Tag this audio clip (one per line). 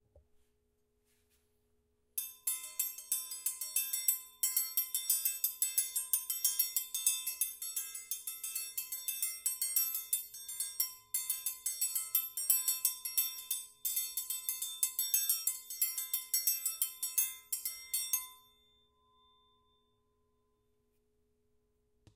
Brazil
Forro
Percussion
rotating
stereo
Triangulo